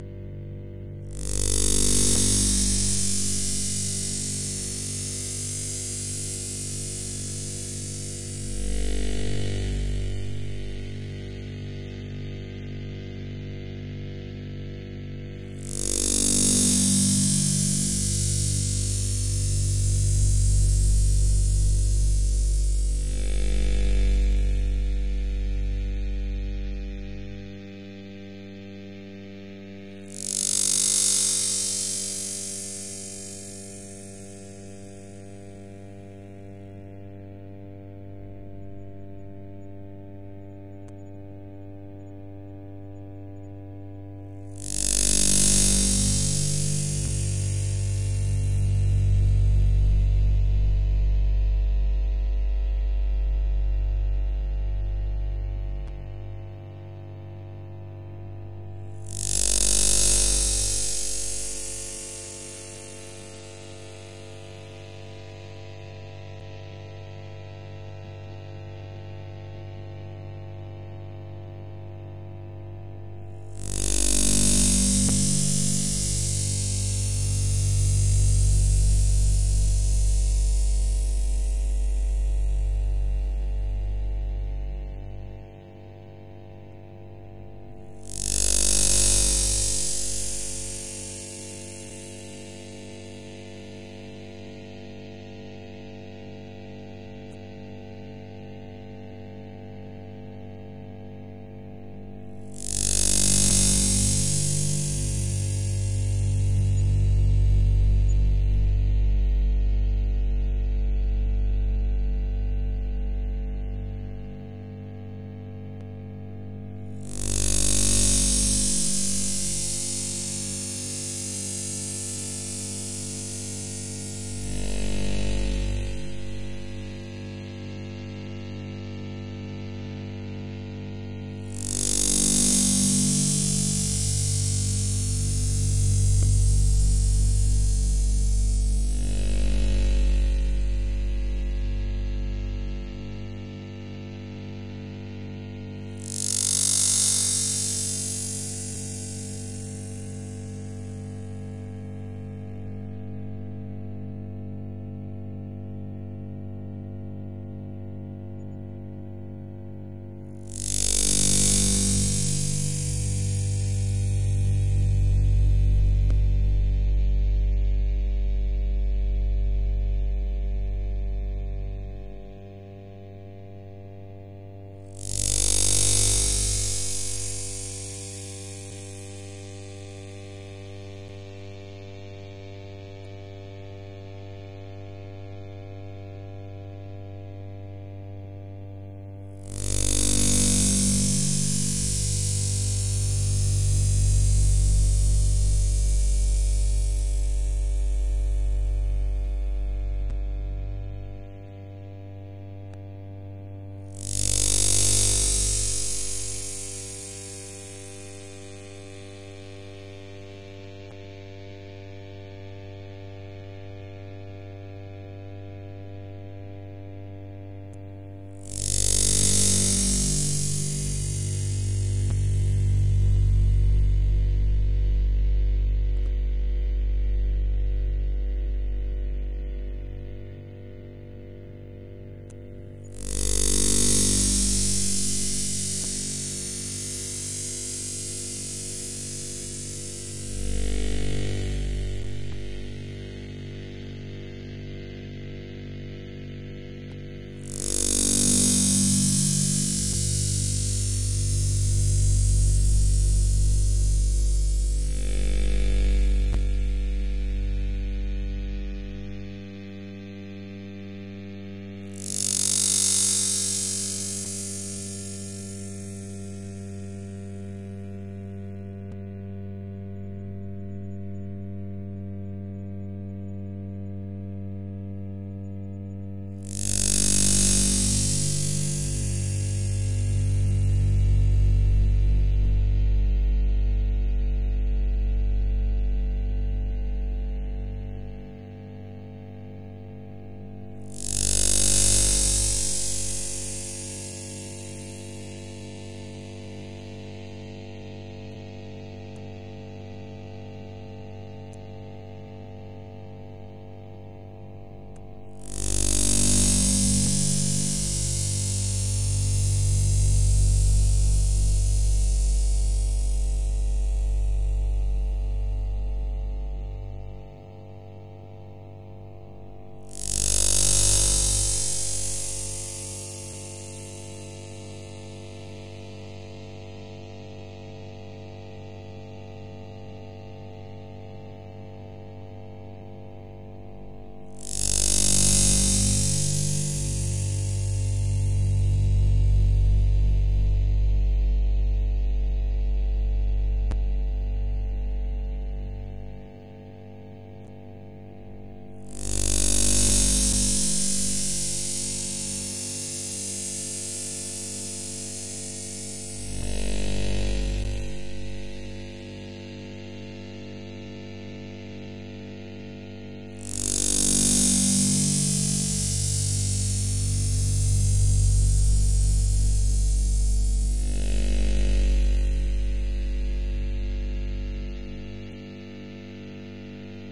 Ocean of Bits Electric Waves Noise
Electronic sound that imitates an ocean, but with electronic sounds, for meditation
bits, ocean, waves